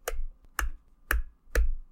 Switch Noises
light, switch, button, flicker